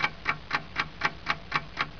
Ticking Clock
Ticking Mantle Clock.
clok, tick, ticking